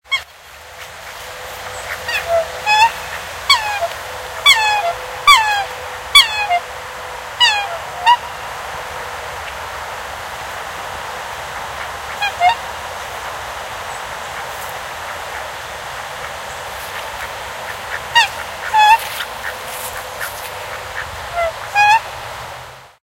I recorded a black swan trumpeting along Turtle Creek in Dallas, Texas on December 29, 2019. The recording was made with my Galaxy 8 Android phone.